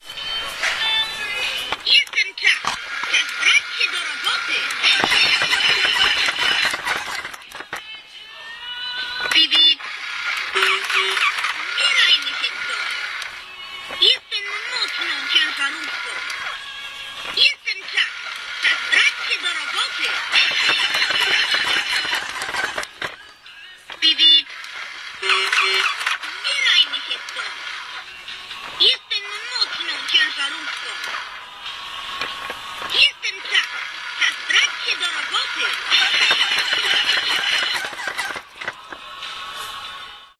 truck toy 191210
19.12.2010: about. 20.40. speaking and moving truck toy. Carrefour supermarket in Poznan. Franowo Commercial Center in Poznan.